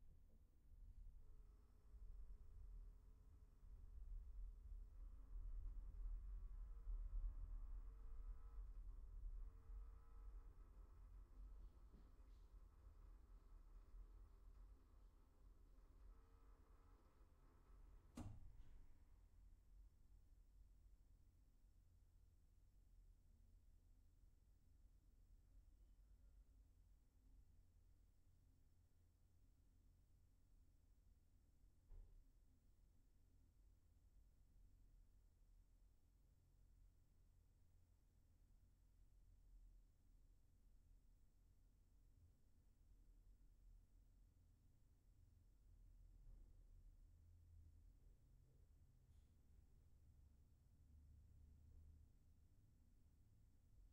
distant sound from the wc flushing. we hear the water evacuating and "reloading".